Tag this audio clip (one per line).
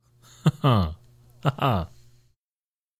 amity
benevolence
charity
cordiality
fellowship
friendliness
friendship
goodwill
human
kindness
male
man
vocal
voice
wordless